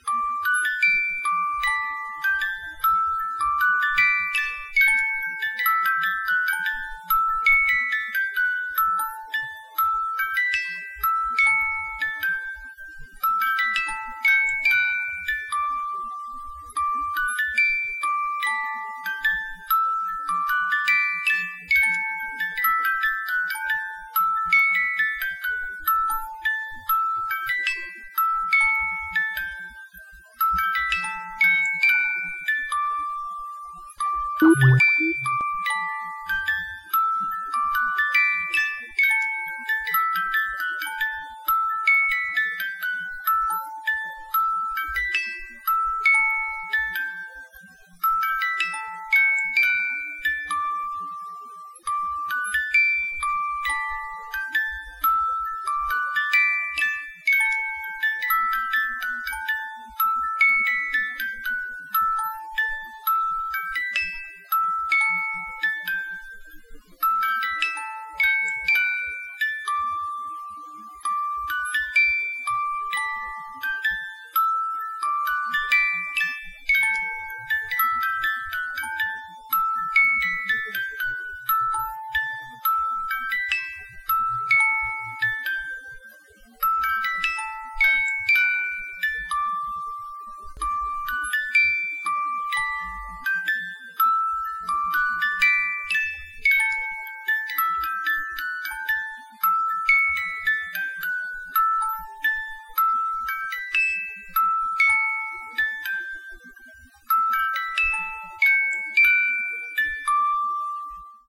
my music box
I'm surprised I never uploaded this, I recorded it like a year ago. anyway, this is from a rather old, second hand music box that is missing the ballerina. I'm not sure what tune it is, so if anyone knows, tell me.
music, box, tune